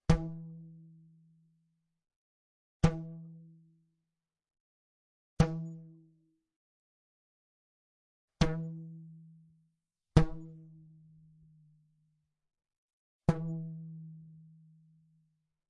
Plucking a flexed rubber band with close pick up. The note is an E3 (~165 Hz) and has a soft, synth-like sound.